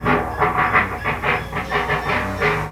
Sample from a guitar loop at a live noise music recording.
ambient, burger, guitar, jesus, sound, strange